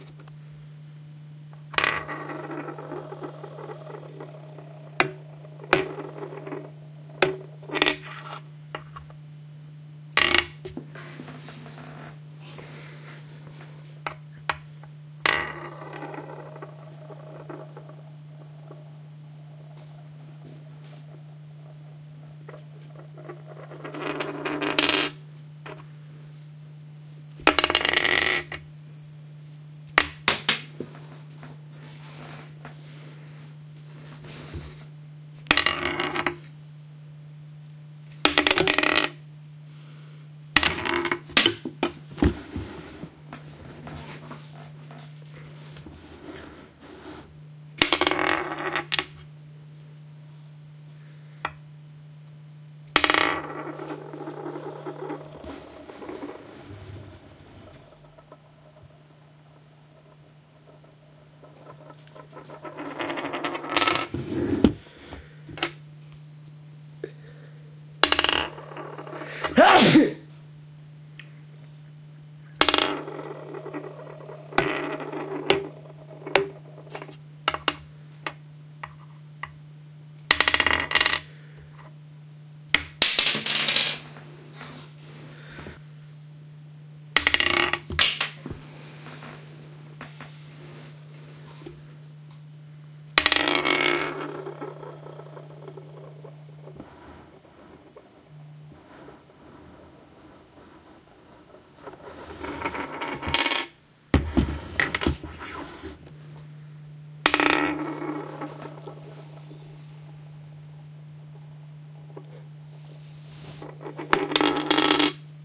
A spinning top (dreidel). It spins around and then falls over. I sneeze by accident partway through.
Recorded with a Canon GL-2 internal microphone.